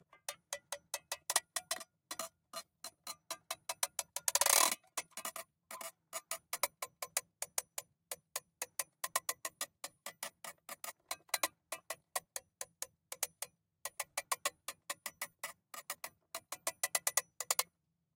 DeLisa Foley clicky robot talk 02
A rain tube making clicks which go under a robot's speaking. DeLisa M. White -- Foley artist.
clicks, clicky, tube, robot, talking, rain